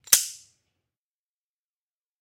Pulling the trigger on a revolver in a room. No gun shot.
gun, pull, shoot, trigger